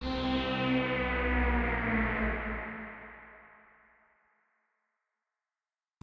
sfx pitchBend
Pitch bend in saw wave with reverb added. made with LMMS and synth 1 .